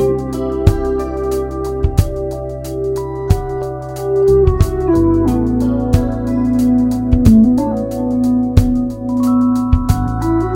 this is a sample, recorded with my friends. i forgot,what the bpm was. sorry. use it!!